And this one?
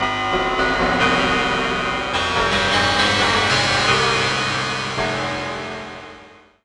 Prepared Piano 2
Created in u-he's software synthesizer Zebra, recorded live to disk in Logic, processed in BIAS Peak.